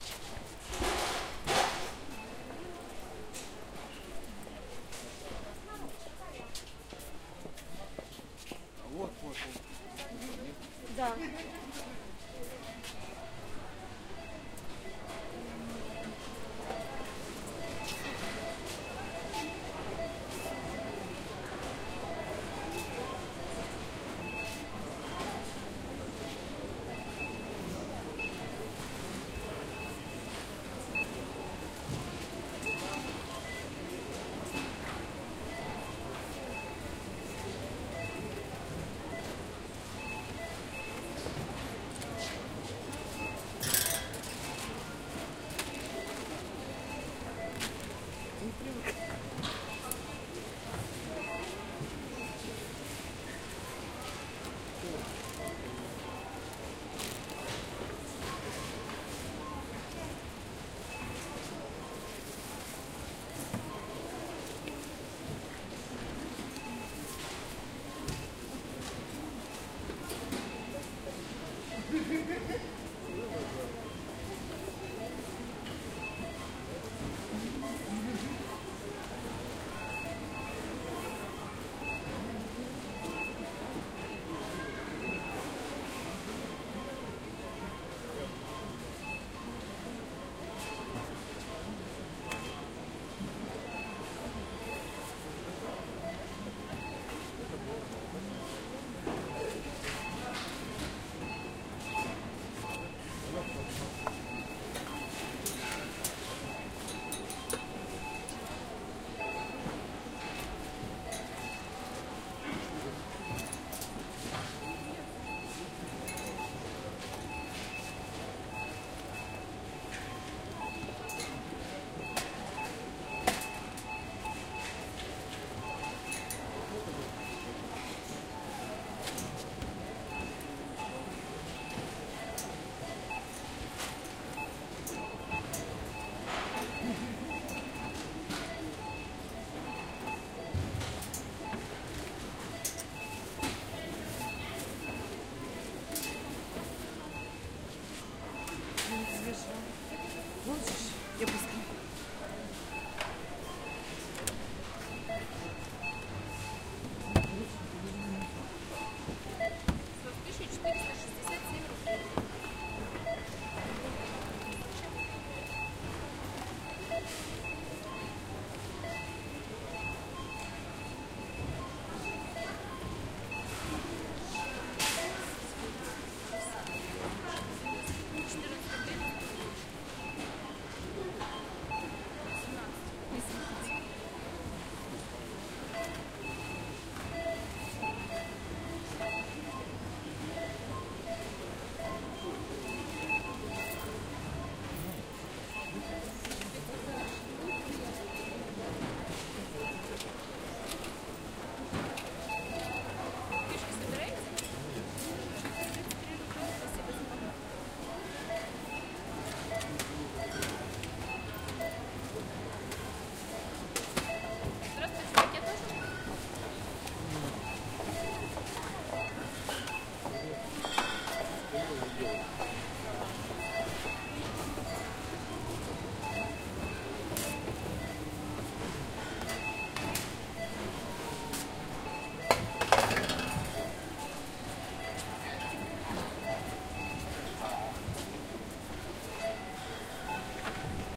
Shopping in the supermarket. The sound of the cashiers beeping.
Recorder: Tascam DR-40
City: Omsk
Recorded at 2014-03-29
supermarket, cashiers-beeping, hum, Shopping, field-recording